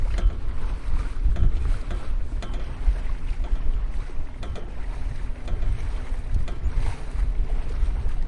Clacking wind against sail pole.
sailing, sail-pole, water-ambience